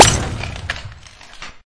this sound is made in audacity, uses a several layers of samples and equalization, some samples are pitched and compressed to make this mecha-impact unique for a intro of a song